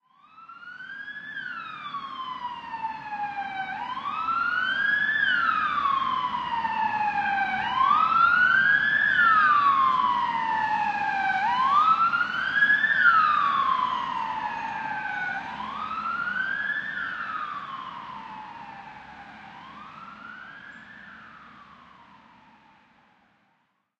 Distant Ambulance Siren
Stereo recording of an ambulance passing from left to right, with quite some traffic in the background and a very faint walla.
Zoom H6 with stock XY mics.
alarm, ambulance, distant, emergency, far, fire, fire-truck, firetruck, police, siren, sirens, traffic